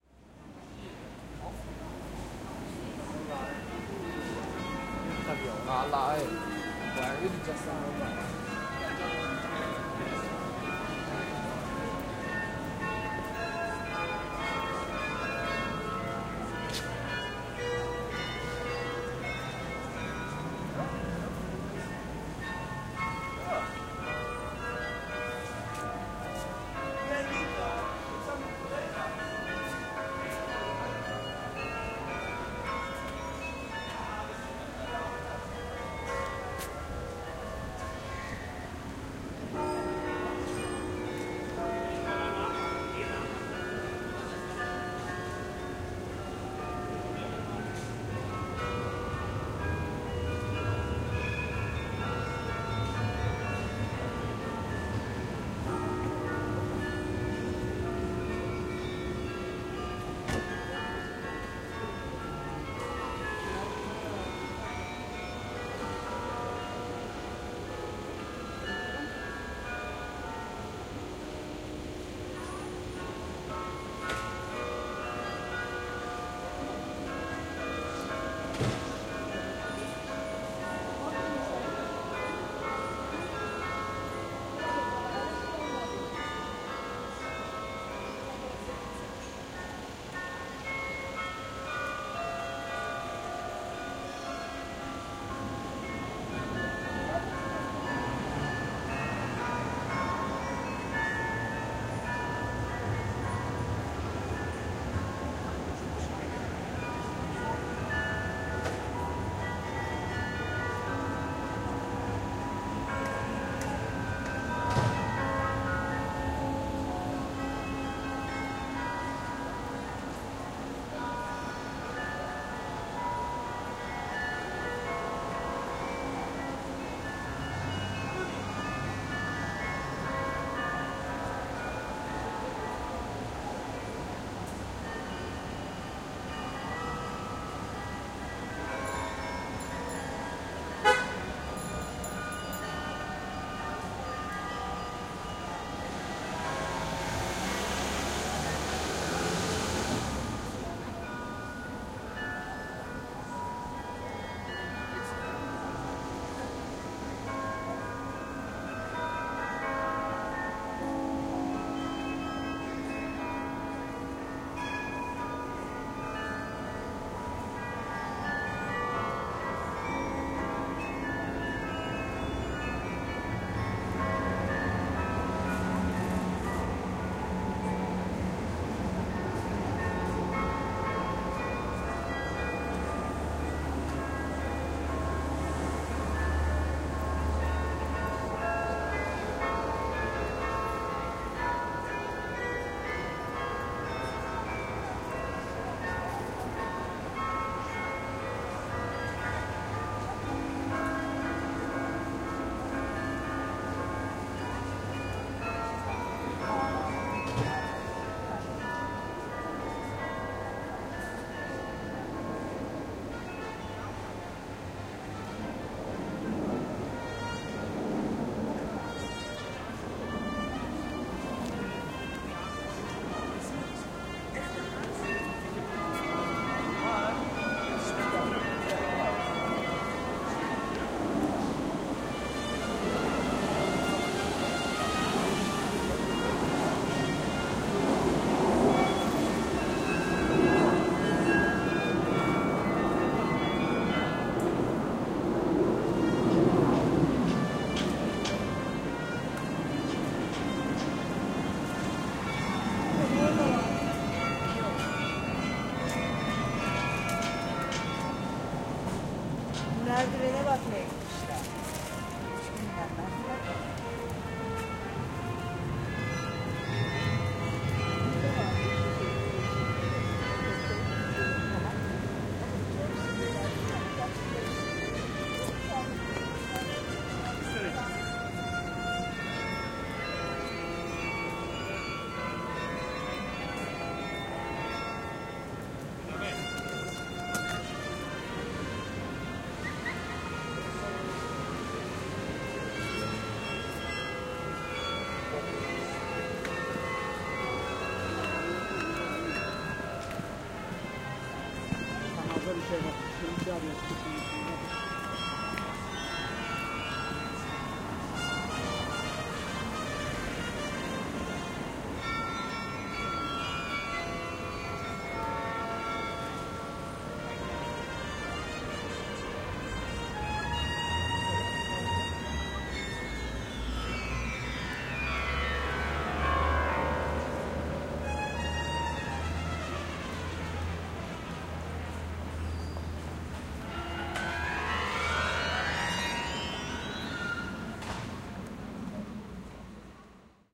Recording of the Westerkerk carillon (Amsterdam) played manually an improvising a musical dialogue with a trumpet played from the nearby streets. M-Audio Microtrack with its own mic.
street-music, amsterdam, bells